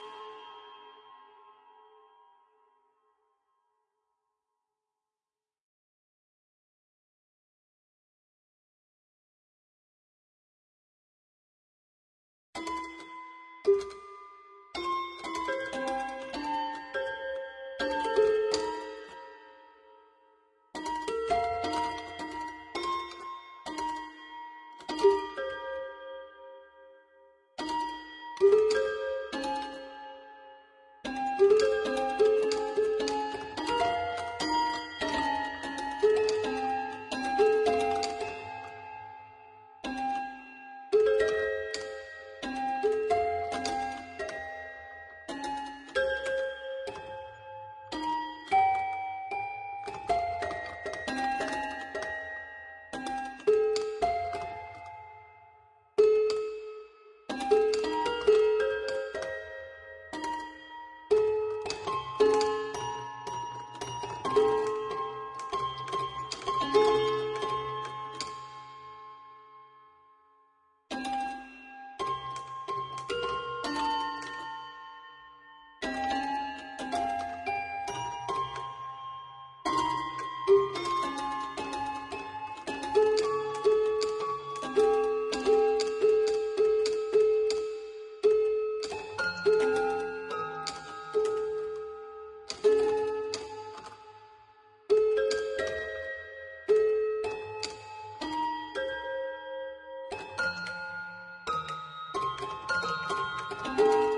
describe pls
Toy Piano Breakdown
A timid recording of a damaged toy piano, spaciously miked and intimately captured.
toy-piano, free-improvisation, broken-instruments, fault-tolerance